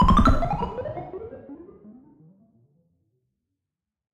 Retro Game Sounds SFX 200
pickup, weapon, electric, fx, soundeffect, sounddesign, retrogame, sound, shoot, gun, sci-fi, sfx, effect, freaky